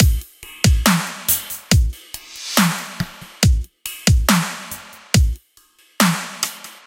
140 dubstep loop 6
Genre: Dubstep drum loop
1, 140, 24, bits, BPM, drum, Dubstep, hard, high, kick, loop, punchy, quality, snare